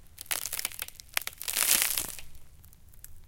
Ice Crack 6
break, crack, foley, ice, ice-crack, melt